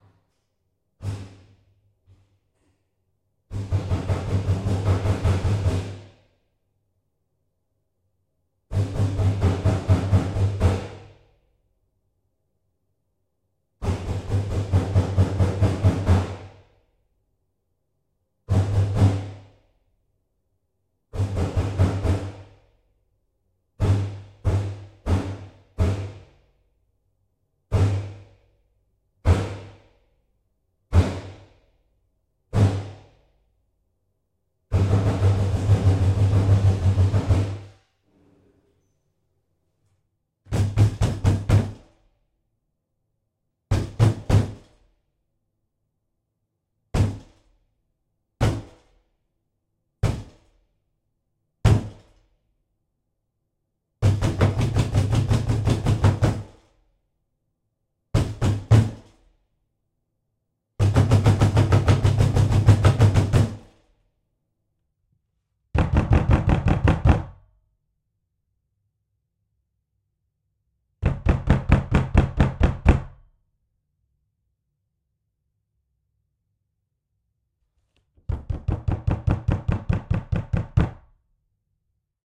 190318 pounding banging wood door close distant, mono, KMR82i
Pounding on sliding door from different perspectives, foley. Neumann KMR82i.
bang, door, knock, pound